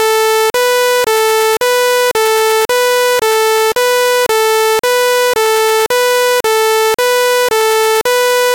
paget ludovic 2014 2015 pompiers fondu
It is a sound of 8.5 seconds containing 2 same tracks with differents effects.
The track :
Represents the firemen ring with two notes : la(440 Hz) si(493.9 Hz) ; each during 0.5s. I dupplicated them 7 times.
I dupplicated the track in order to make modifications :
First track : Sound at the left with a fade in along the sound.
Second track : Sound at the right with a fade out along the sound.
This sound is like a A fire truck that goes from left to right.